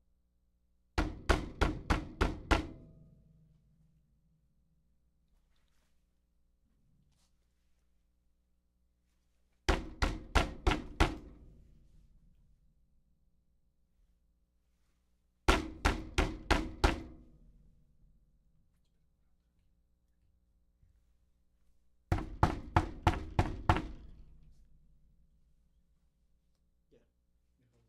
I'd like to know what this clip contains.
Golpe de puerta
door, knock, knocking, knocks, wood